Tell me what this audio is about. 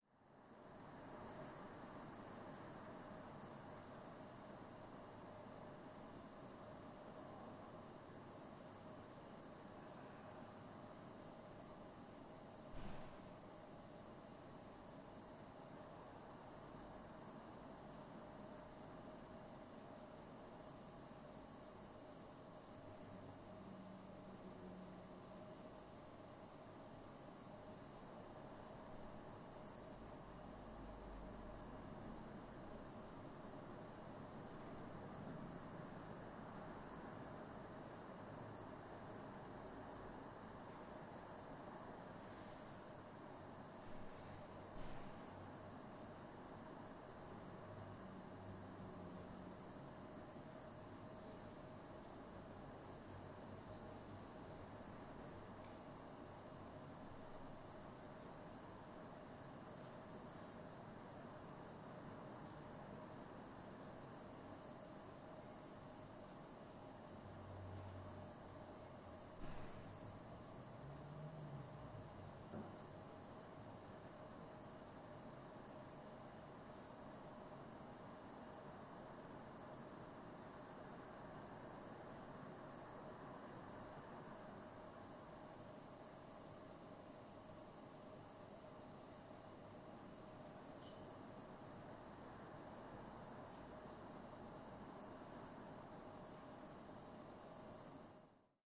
Industrial hall ambience